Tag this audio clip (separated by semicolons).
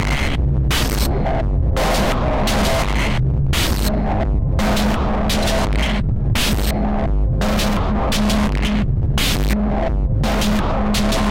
glitch; mangle